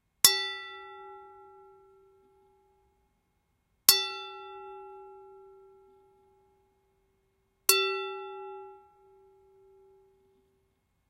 A fork, travelling quickly and meeting a large plate whilst doing so.
Recorded with: ZoomH2n, XY mode